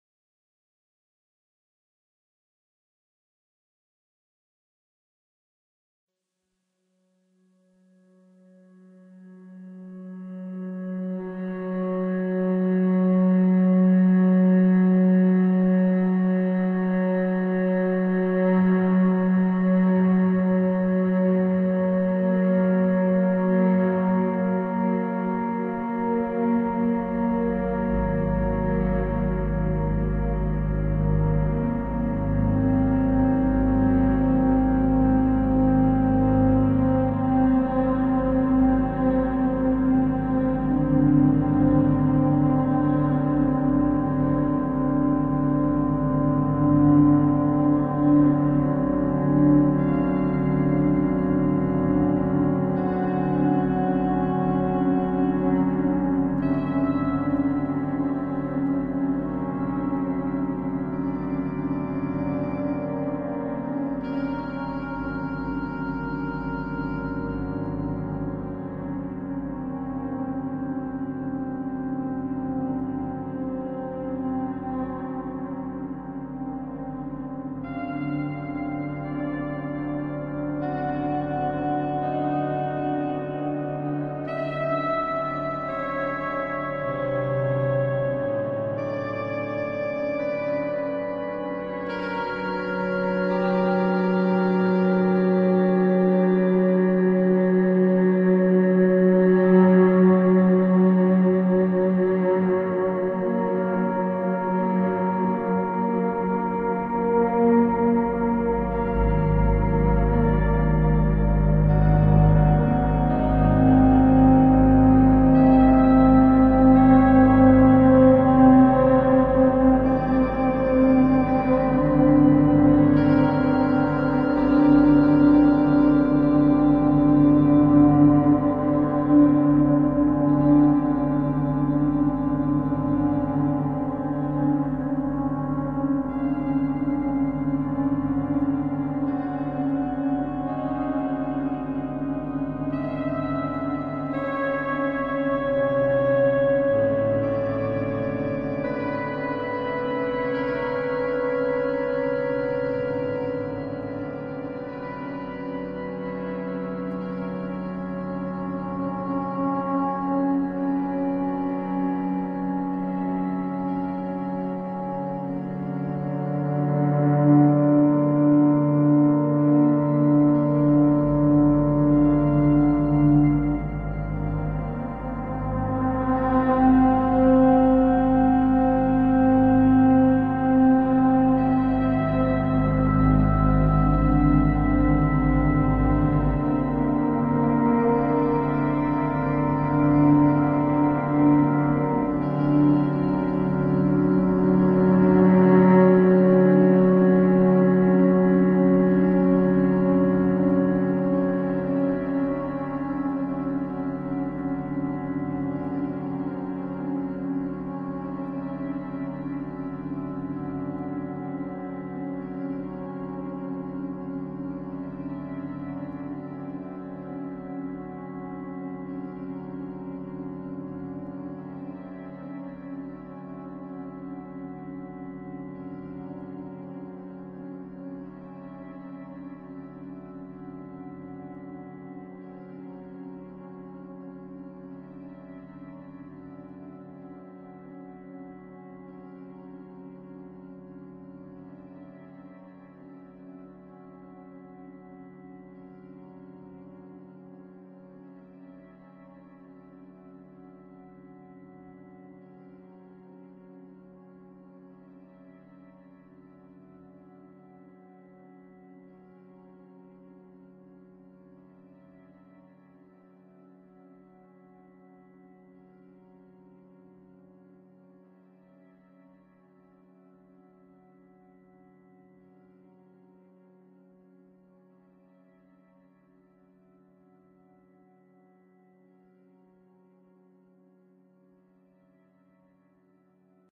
Mysterious Misty Morning
This is a tune I've made out of a short saxophone riff (using Edirol Orchestral VST) which I've pitch shifted and reversed and reverbed and stuff and I think it sounds a bit 80s and very much like background music for some sort of TV program or film with spying or detectives or ghosts or possibly straight horror.
atmosphere, background, cinematic, creepy, dark, drama, dramatic, film, haunted, horror, incidental, interstitial, moody, music, mysterious, noir, scary, sinister, spooky, suspense